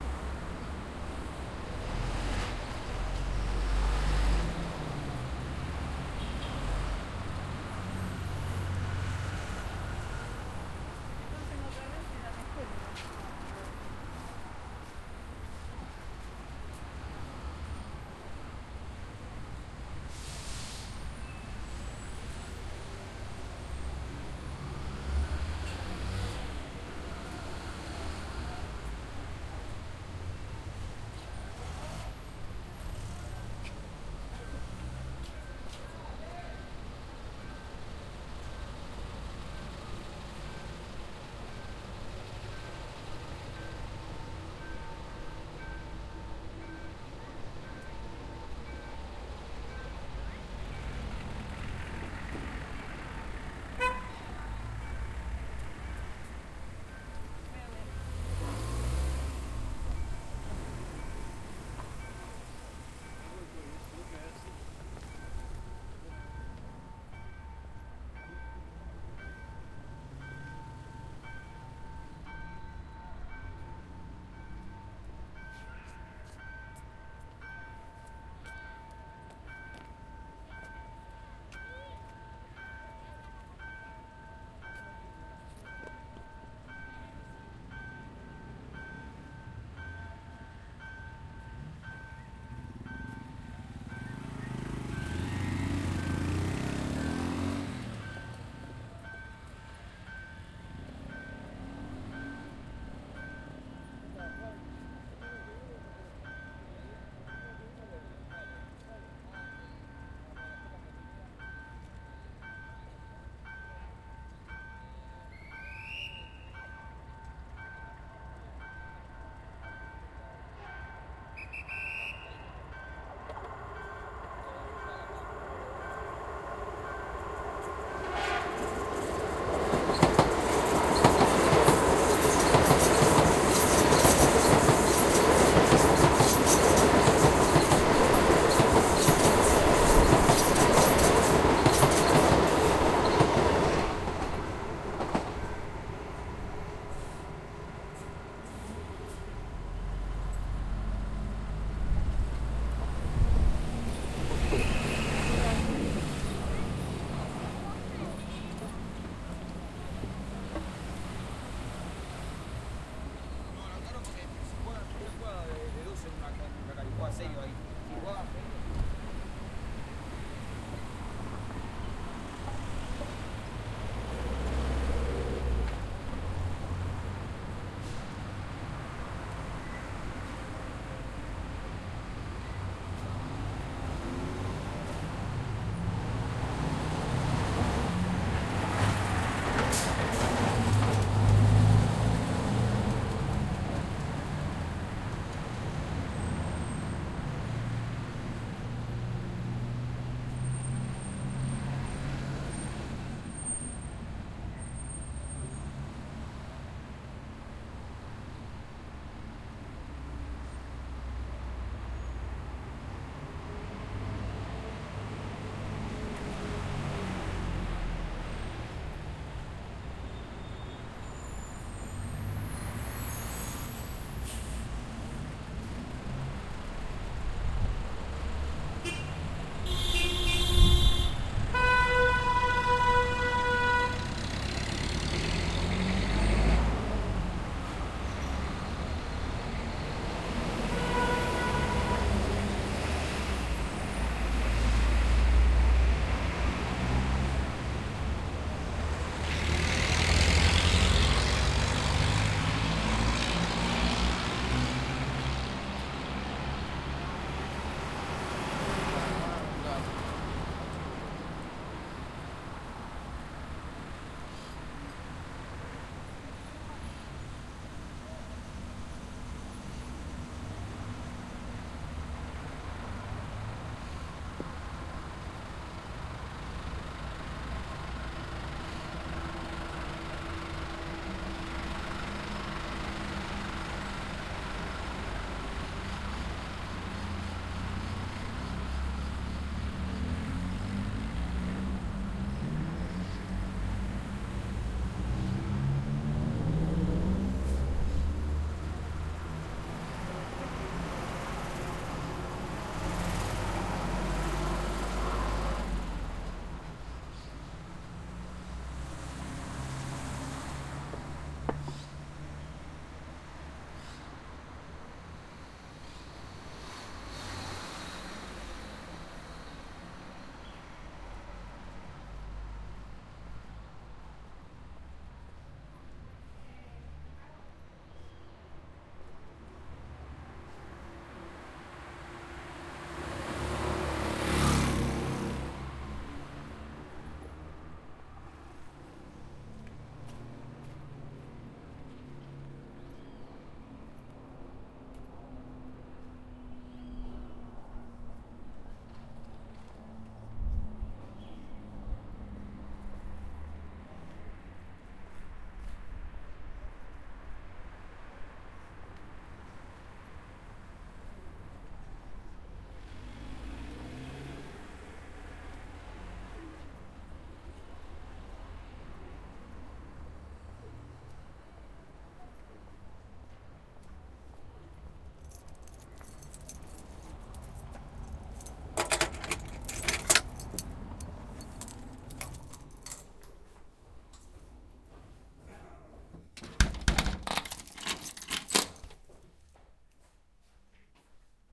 Audio de deriva sonora 4 cuadras desde av rivadavia hacia avellaneda.